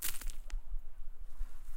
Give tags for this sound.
crunch
stick
break

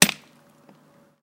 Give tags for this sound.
field-recording
noise
tools
unprocessed

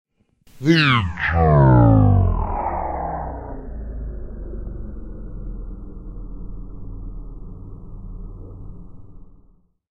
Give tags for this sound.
voice
speech
vocal
female
fx
girl
effects

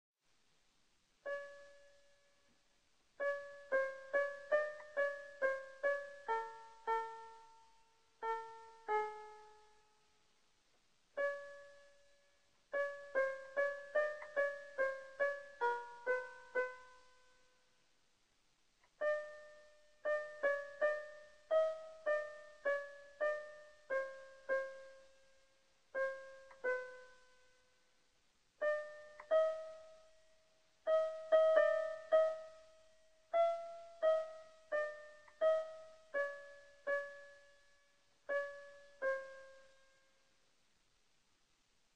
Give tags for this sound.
pain
dolor
laberinto
n
pan
confusi
sad
Fear
crying
madness

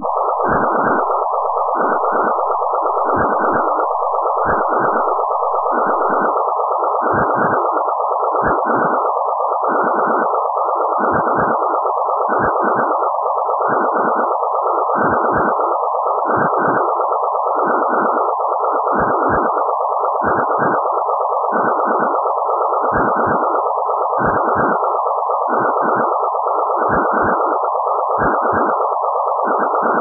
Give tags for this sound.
iteration
computer
processed
conversion
iteractive
image-to-sound
synthetic
sound-to-image
image
picture

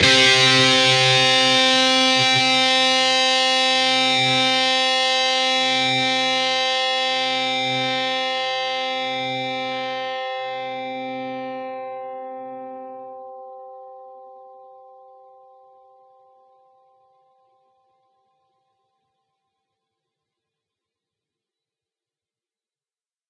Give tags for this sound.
guitar-chords
guitar
chords
distorted-guitar
distortion
distorted
lead
lead-guitar

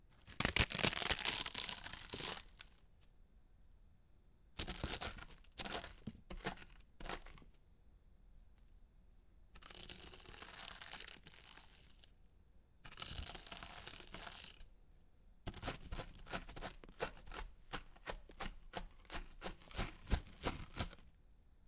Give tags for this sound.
crunching
chips

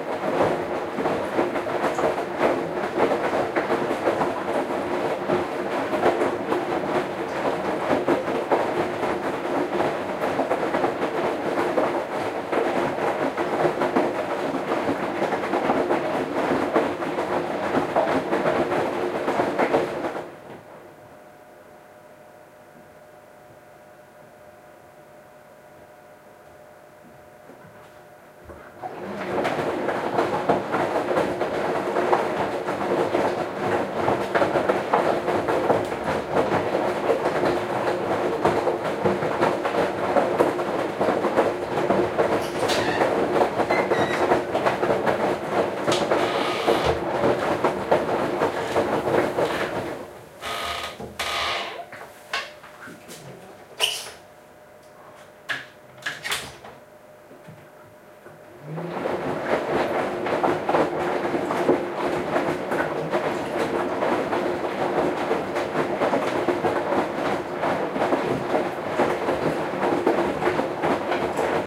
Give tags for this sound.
cycle
machine
rinse
soap
wash
washing
washing-machine
water

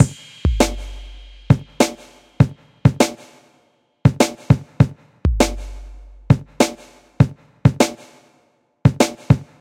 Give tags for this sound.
hiphop
samples
bass
tr808
drum

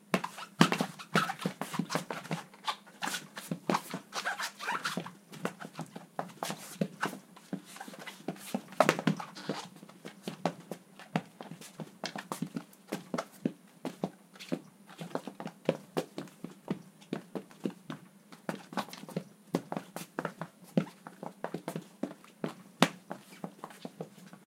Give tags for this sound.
Action
Battle
Fight
Foley
Shoes
Shuffle
War

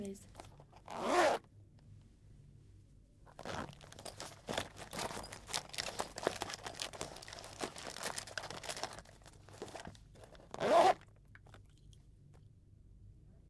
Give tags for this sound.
3Semestre
AKG414
AnaliseMusical
AnhembiMorumbi
CaptacaoEdicaoAudio
EscutaEcologica
JJGibson
ProTools
RTV
SonsDeUniversidade
SoundscapeMusic
UniversidadeAnhembiMorumbi

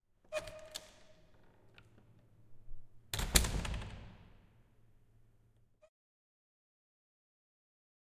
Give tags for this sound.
CZ
Czech
Pansk
Panska

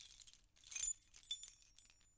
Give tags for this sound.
vol
key
egoless
chimes
shaking
sounds
natural
0

